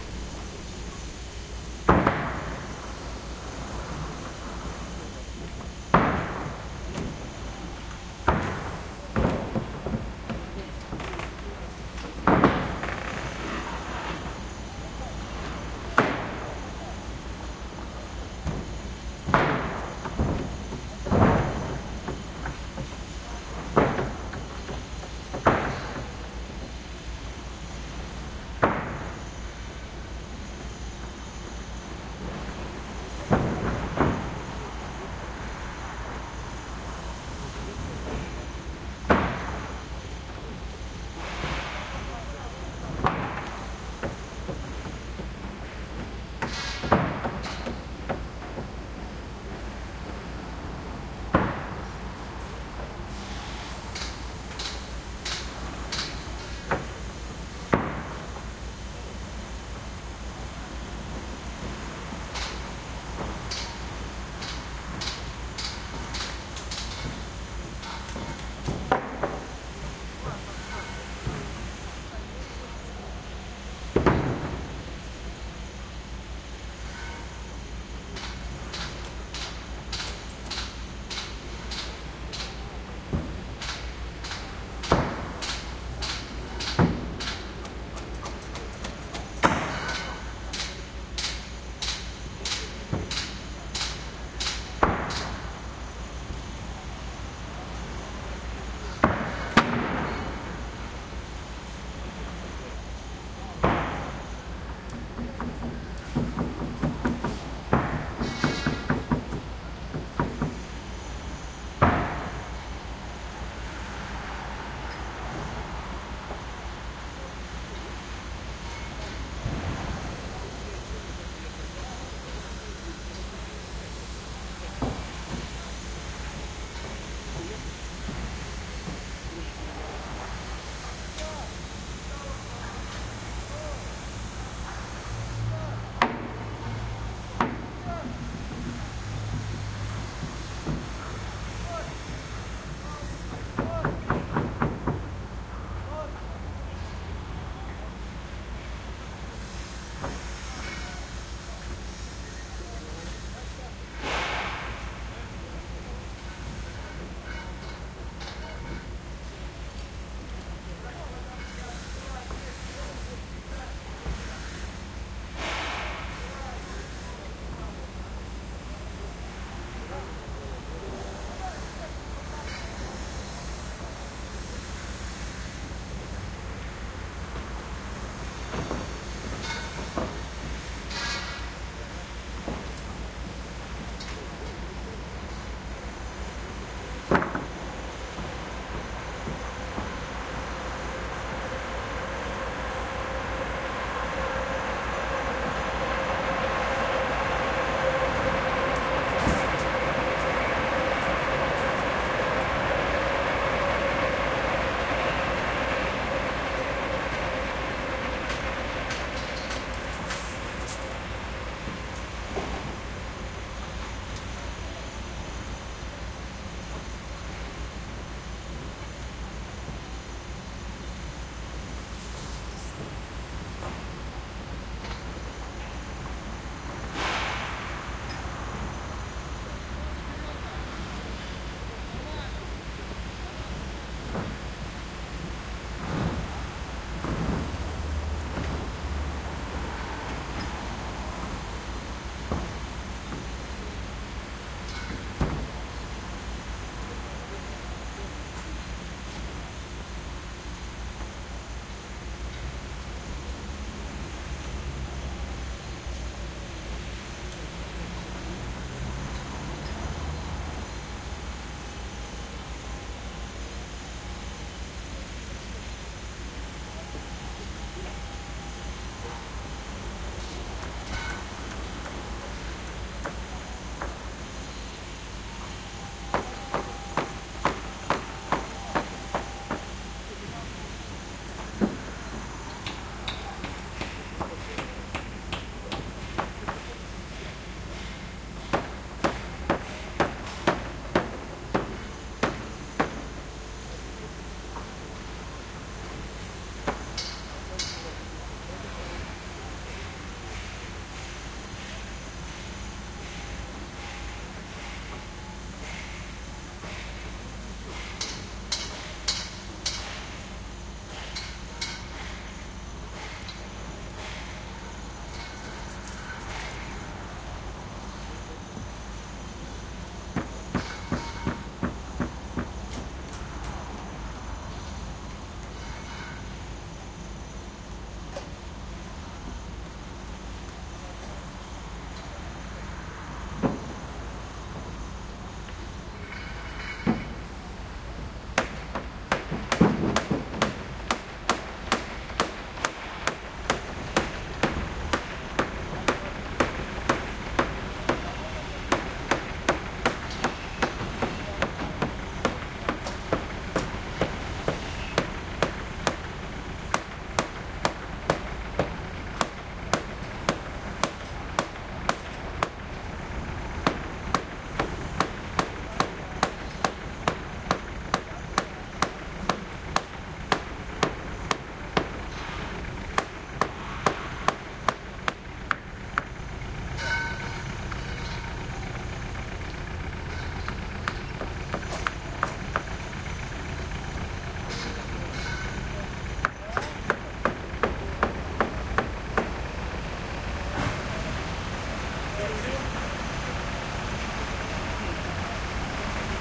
Evening ambience at the huge construction site in Moscow, Russia, different angle.
MS stereo recording made with Sennheiser MKH-418S & Sound Devices 788T - decoded to plain stereo.